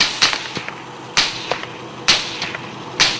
firecracker gun shots 1
Specific details can be red in the metadata of the file.